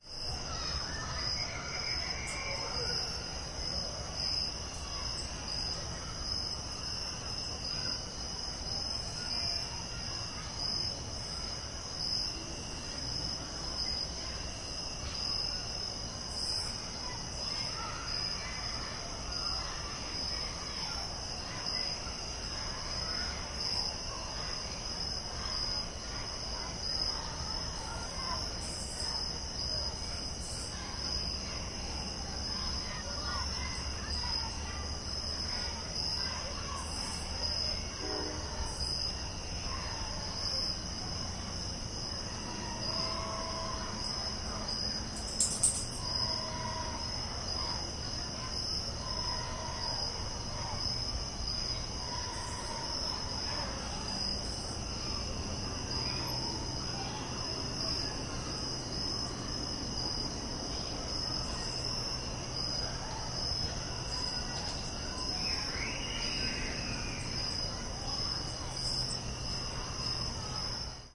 CricketsandCheerleaders Home Aug2011
From my backyard you can hear cheering from the stadium where the local high school plays. In this recording you can hear the crickets and other late summer insects cheering for Jayem as much as the cheerleaders recorded with a Zoom 2 hand recorder. (p.s. Jayem beat Stafford that night)
cheerleaders, James-Monroe-High-School-Football, crickets